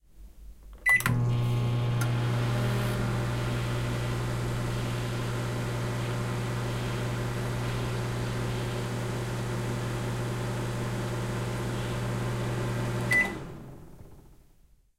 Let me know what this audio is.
A microwave running
microwave, running, kitchen